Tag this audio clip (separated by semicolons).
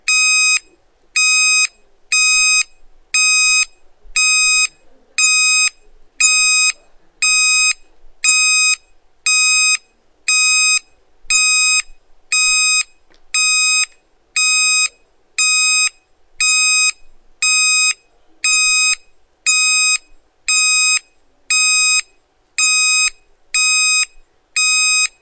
alarm; cardreader